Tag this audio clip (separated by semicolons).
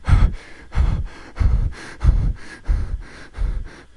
breathing; heavy-breathing; scared